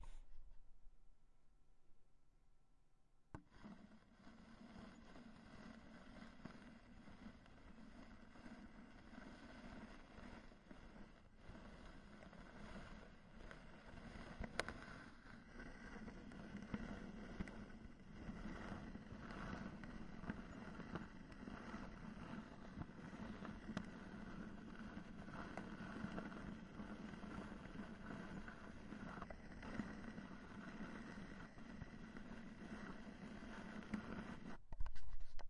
Done entirely on physical scratch recordings, this sound is made to emphasize an old record player in the stereo format.